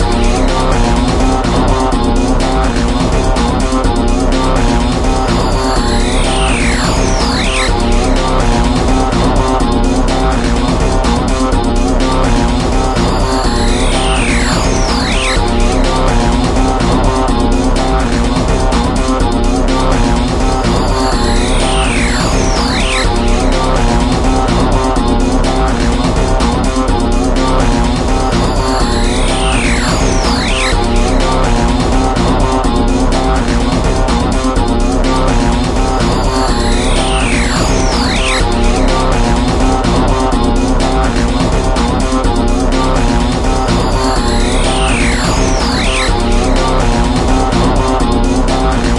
Rocker2 Full Mix
Mix created with mixpad for my game Asteroids#1
Mix, Rocker, Roll